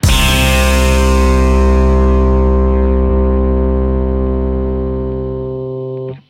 12 C death metal guitar hit
Guitar power chord + bass + kick + cymbal hit
bass, black, blackmetal, death, deathmetal, guitar, hit, metal